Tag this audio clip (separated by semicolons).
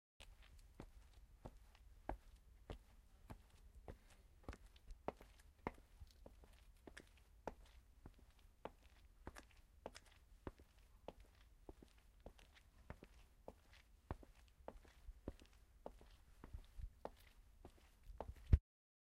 footsteps; shoes; soundscape; walking; hard; floor; ambient; steps; walk; foot